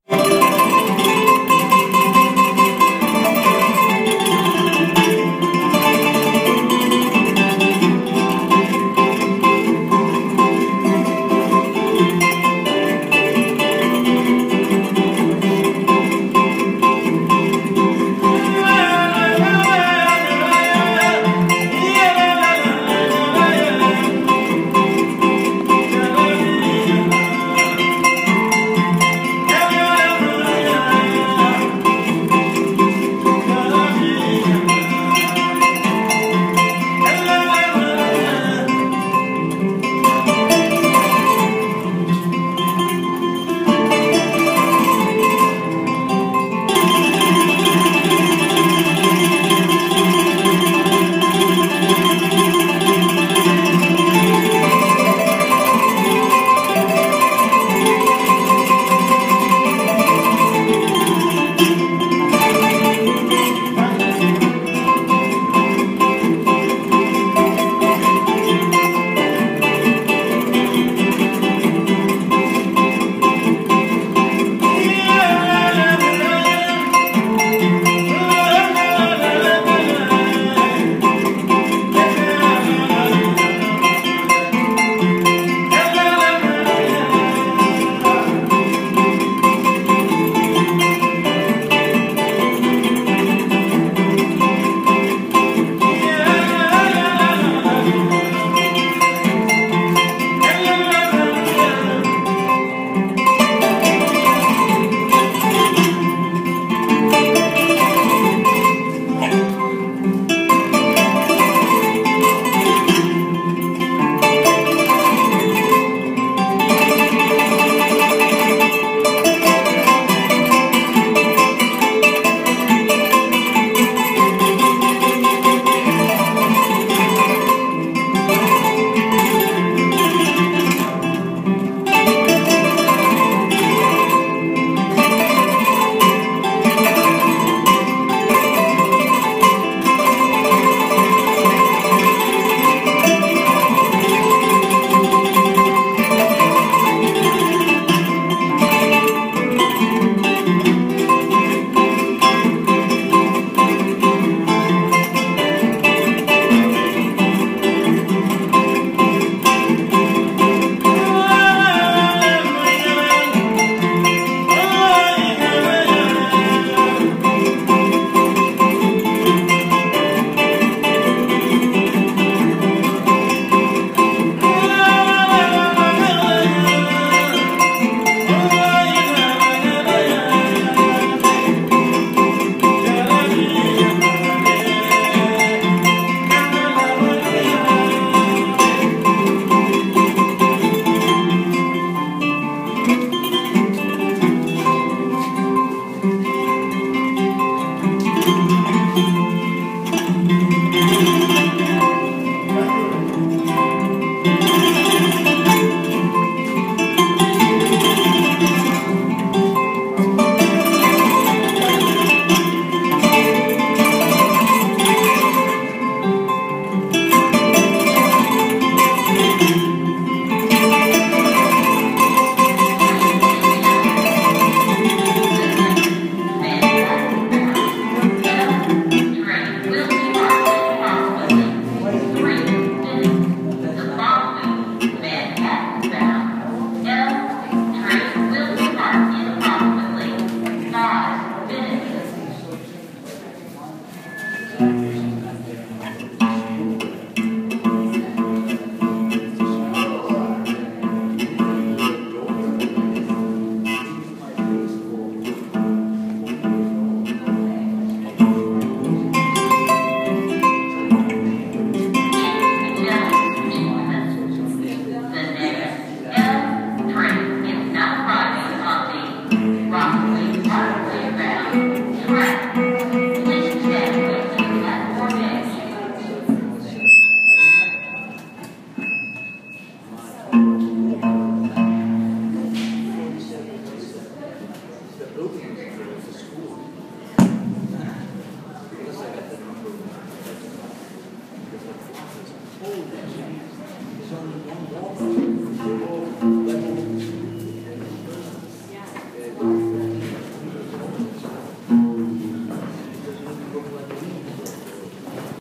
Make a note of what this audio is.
Kora + vocals in NYC Subway
Recorded in a subway station, with an iPhone 6, 10 ft away. This guy plays at various stations, namely Bedford Ave and Union Square (NYC). He's got a very lively playing style and is always a joy to listen to. I want to get better recording equipment for next time.